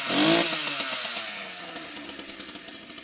Chainsaw Single Rev to Idle
Buzz, electric, engine, Factory, high, Industrial, low, machine, Machinery, Mechanical, medium, motor, Rev